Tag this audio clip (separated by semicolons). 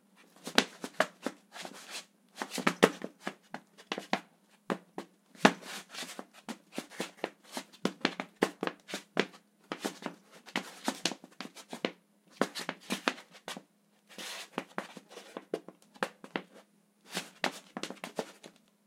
Action; Battle; Fight; Foley; Shoes; Shuffle; War